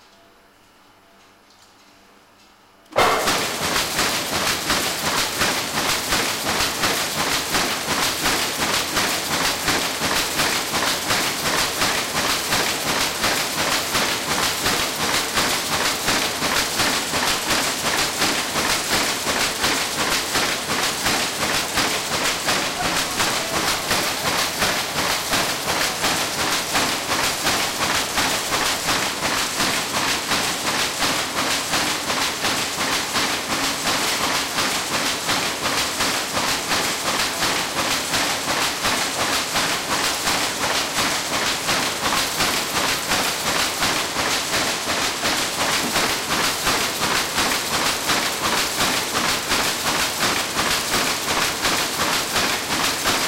Queen Street Mill, automatic loom starts and runs
In the Queen Street textile weaving mill, Burnley, Lancashire, we hear the automatic loom start up and run, weaving cotton toweling.